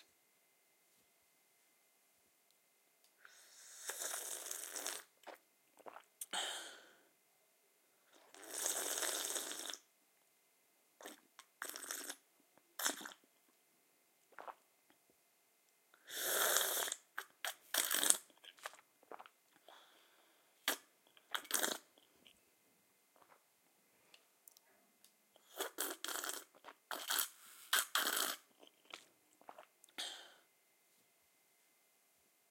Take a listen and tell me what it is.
Slurping: Slurping of water in cup, obnoxious sounding, slurping fast and slow, short slurps and long slurps, breathing present in recording. Recorded with a Zoom H6 recorder using a stereo(X/Y) microphone. The sound was post-processed in order to enhance sound (subtle compression and EQ).
Slurping, OWI, Human-sound, Mouth, Water